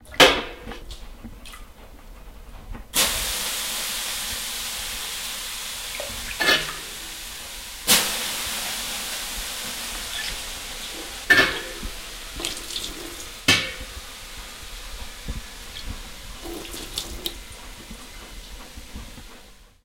in sauna 3
In a sauna: taking water from a metal bucket and throwing it on the hot rocks. Hot steam hissing loudly. Take #3.
bucket, hiss, metal, sauna, steam, water